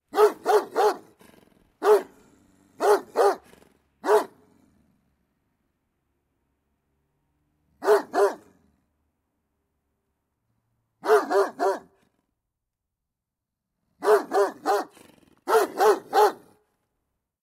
Angry big dog barking - Close [d15]
An angry big dog barking, close perspective - approx. 1m, EXT. It's a Central Asian Shepherd Dog. Recorded with Zoom H4n Pro, Ural, Russia.
angry, bark, barking, big, close, dog